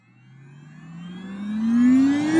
g machine power up 1
the sound of a machine starting up